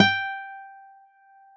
A 1-shot sample taken of a Yamaha Eterna classical acoustic guitar, recorded with a CAD E100 microphone.
Notes for samples in this pack:
Included are both finger-plucked note performances, and fingered fret noise effects. The note performances are from various fret positions across the playing range of the instrument. Each position has 5 velocity layers per note.
Naming conventions for note samples is as follows:
GtrClass-[fret position]f,[string number]s([MIDI note number])~v[velocity number 1-5]
Fret positions with the designation [N#] indicate "negative fret", which are samples of the low E string detuned down in relation to their open standard-tuned (unfretted) note.
The note performance samples contain a crossfade-looped region at the end of each file. Just enable looping, set the sample player's sustain parameter to 0% and use the decay and/or release parameter to fade the
sample out as needed.
Loop regions are as follows:
[200,000-249,999]:
GtrClass-N5f,6s(35)